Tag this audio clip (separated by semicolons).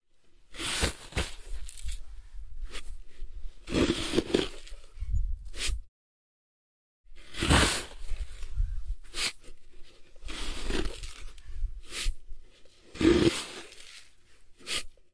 Pansk; CZ; Panska; Czech; sand